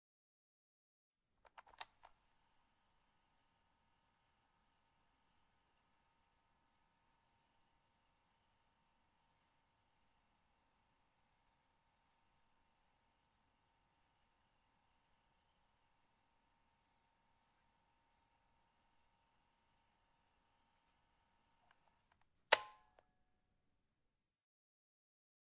Cassette Tape Play
Click the button Play
player, Tape, play